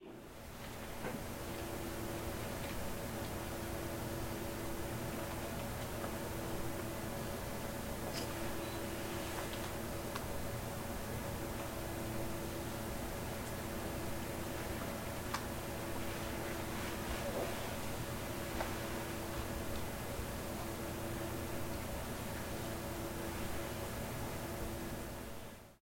The sounds of an elevator's interior.